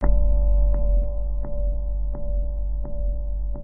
Plunk Slice Long
Slice of sound from one of my audio projects. A bassy synth sound. Edited in Audacity.
Plunk, Slice, Synth